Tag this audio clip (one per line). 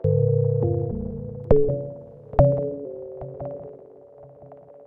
bass
bleeps
dub
experimental
pad
reaktor
sounddesign
space